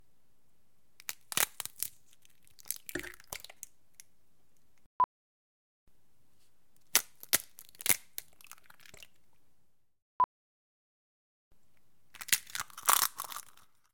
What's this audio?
Cracking egg
catering, empty, field-recording, kitchen, sink, stereo, water